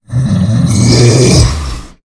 A powerful low pitched voice sound effect useful for large creatures, such as orcs, to make your game a more immersive experience. The sound is great for attacking, idling, dying, screaming brutes, who are standing in your way of justice.
fantasy, gamedeveloping, gaming, arcade, indiedev, sfx, indiegamedev, Orc, Voices, Talk, games, vocal, low-pitch, RPG, monster, deep, brute, male, videogame, game, Speak, voice, gamedev, videogames, troll